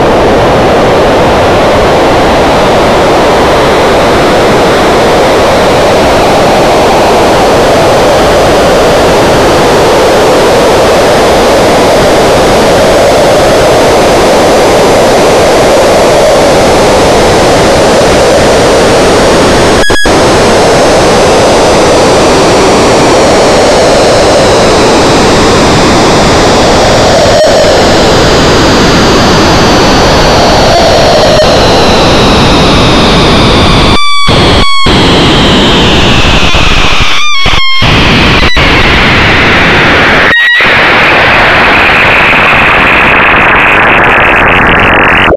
a crazy chaotic screams, the sound is quite warm
made from 2 sine oscillator frequency modulating each other and some variable controls.
programmed in ChucK programming language.